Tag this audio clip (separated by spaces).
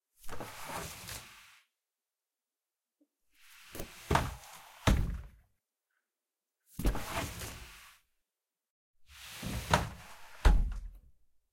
thud wood